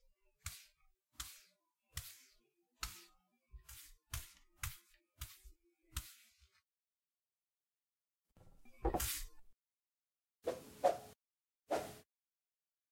Broom Medley
A collection of broom sounds: Sweeping on a hard surface, picking up the broom, swooshing the broom through the air.
Created from the sound files:
pick-up-broom, sweeping, sweep, swoosh, broom